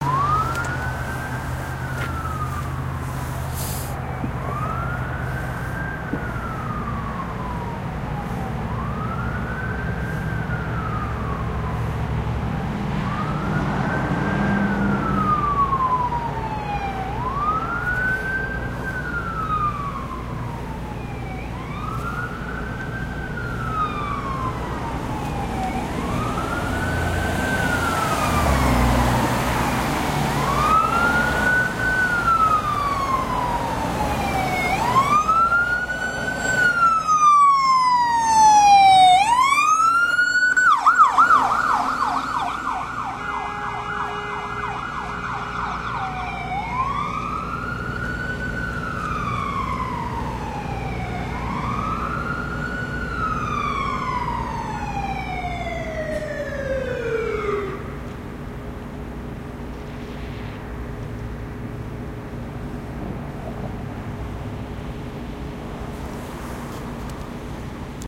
Ambulance Passing Wail And Yelp
This is a stereo recording of an Ambulance passing by. It starts with Wail, Yelp, then back to Wail again. Hope you like.